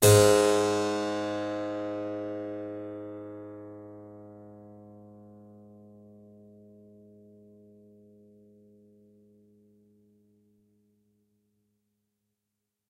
Harpsichord recorded with overhead mics